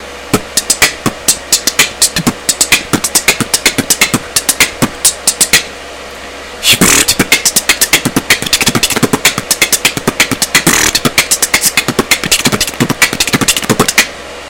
generic beatbox 2
2, beatbox, dare-19, generic